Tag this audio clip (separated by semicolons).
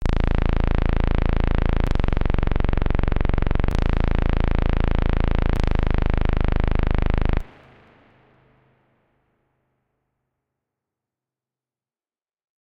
effects; gamesound; whistling; sound